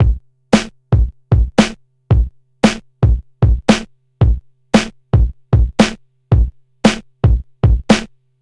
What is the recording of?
114-hippedihop-beat
hip hop drumloop 114bpm